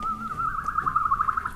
whistle-trill-01
an unaltered whistle special effect by me. I really enjoy sound effects like this in music. I haven't done any in a while, but while I'm recording some miscellany, I'll upload something.
Recorded with stereo mics into a Mackie BlackJack.